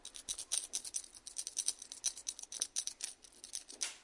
essen mysounds saljan
key chain button (with a pirate on it!) ratteling